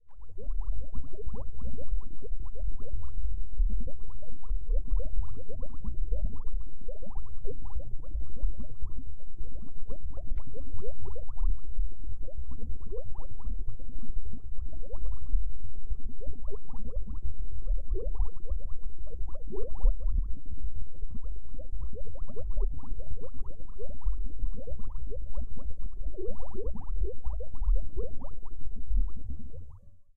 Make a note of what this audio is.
A series of sounds made using the wonderful filters from FabFilter Twin 2 and which I have layered and put together using Audicity. These samples remind me of deep bubbling water or simmering food cooking away in a pot or when as a kid blowing air into your drink through a straw and getting told off by your parents for making inappropriate noises. I have uploaded the different files for these and even the layered sample. I hope you like.
Boiling, Bubbles, Bubble-sound-effect, Bubbling, Cooking, Cooking-pot, Deep-Bubbling-water, Water